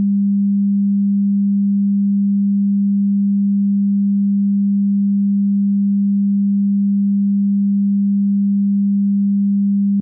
200hz sine wave sound
200hz, sine, sound, wave